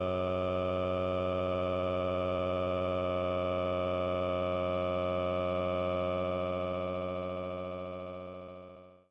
Long Uh Lower
"Uh" sound sound, downshifted